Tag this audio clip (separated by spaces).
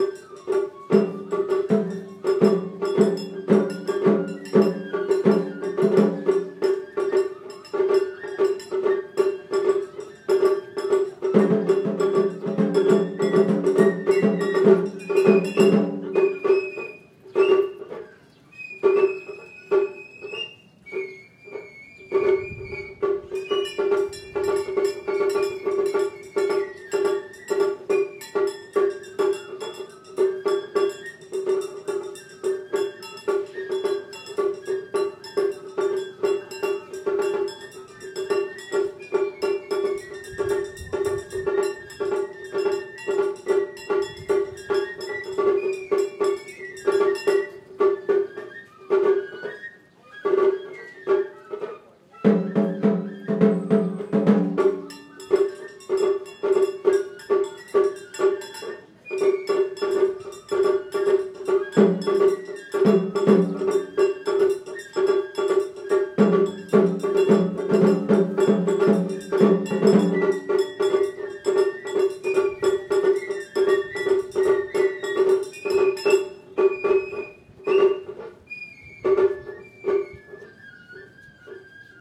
zoom sanja japan flute drum asakusa percussion matsuri field-recording tokyo street traditional japanese music h4 festival